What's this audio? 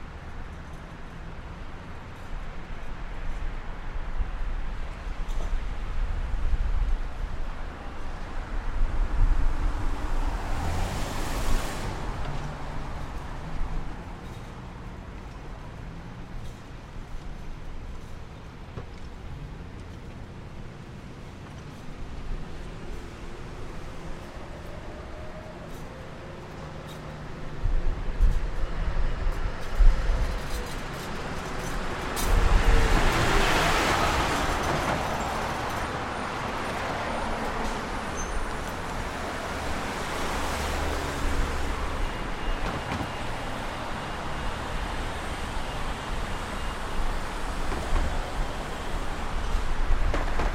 City Street Traffic 04
Afternoon rush hour traffic on a four lane city road recorded from a roof balcony.
Rode M3 > Marantz PMD661.